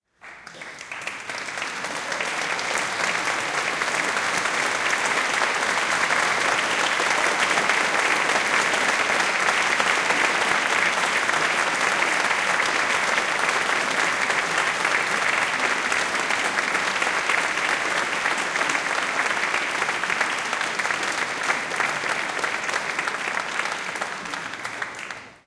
The fourth recording from an event in my town's church.
recording device: Canon XM2 (GL2 for the US)
editing software: Adobe Audition 3.0
effects used: clip recovery, normalization
short, applause, meeting, event